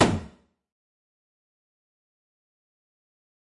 series of percussive sounds mostly kicks and snare synthesized with zynaddsubfx / zynfusion open source synth some sfx and perc too these came from trying various things with the different synths engines
one-shot, percussion, percussive, synthesis